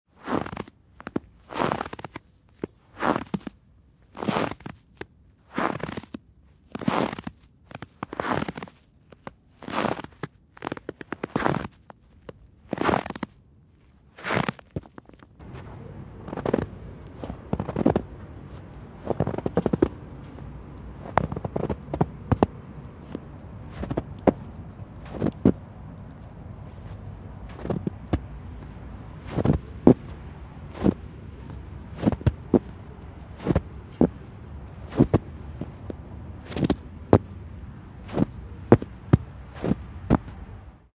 Snow footsteps recorded on Tascam DR60d and Rode NTG-3.